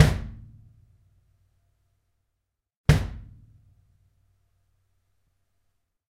Kick - Gretsch Cat Maple 22 - 2x
Kick drum. 2 different hits.
gretsch, kick, drums